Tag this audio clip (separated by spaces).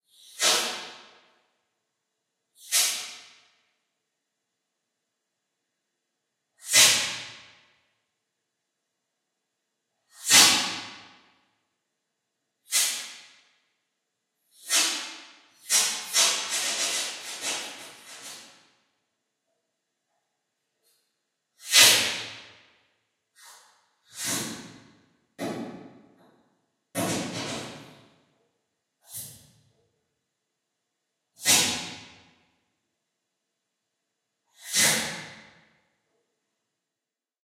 unknown dark brush reverb